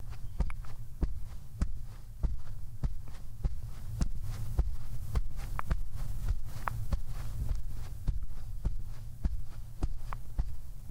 Footsteps on Grass.R
walking on a grass surface
Footsteps, grass, sound-effects